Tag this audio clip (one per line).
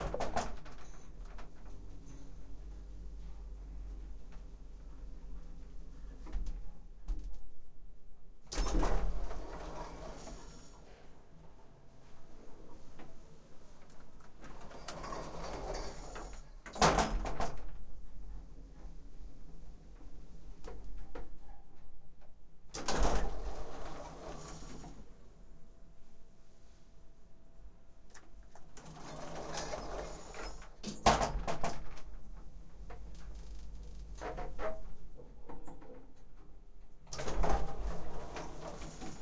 foley
sfx
elevator